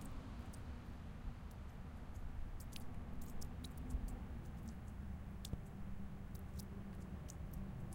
ns drippingwall
Water dripping through a stone retaining wall
dripping, leak, wall, water